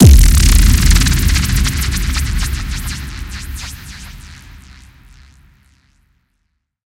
A decisive bassdrum that you hear mostly in dance related music. Specially around breaks and such places.
140, 4, bang, bars, boom, bpm, break, climax, club, dance, downlifter, drop, electro, hardcore, house, trance